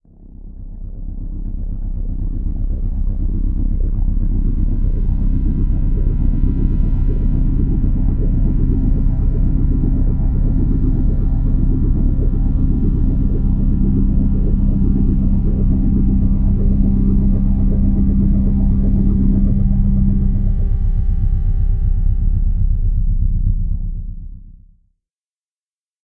Deep & dark drone. Created with 3 synths, layered sounds.